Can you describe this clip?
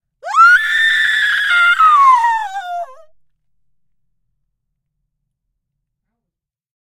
A scream by Annalisa Loeffler. Recorded with Oktava 012 into M-Audio preamp. A bit of overload on the mic capsule
fear, female, horror, human, scream, vocal, voice, woman